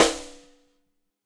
Ludwig Snare Drum Rim Shot
Drum, Ludwig, Rim, Shot, Snare